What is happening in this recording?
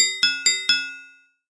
Cow Bells 02
Cow Bells
This sound can for example be used in agriculture simulations and farm games, for example triggered when the player clicks on a cow - you name it!